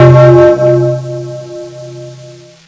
44 church bells tone sampled from casio magical light synthesizer
casio, magicalligth, tone